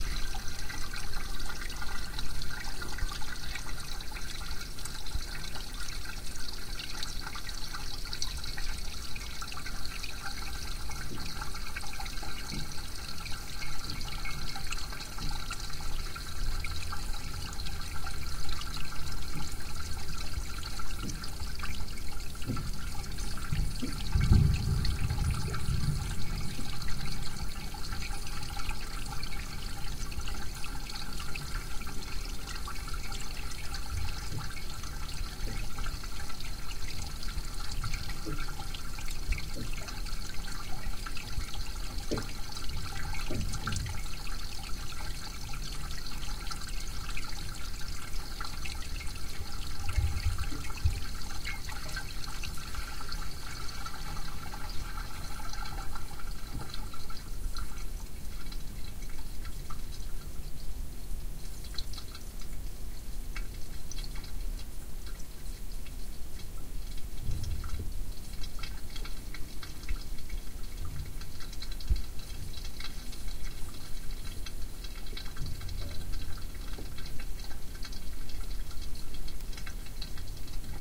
gurgle water in pipe
The water gurgles in the drain sewer pipe. The flow of the water from the bath. The microphone was placed close to the pipe.
Recorded 11-03-2013.
XY-stereo, Tascam DR-40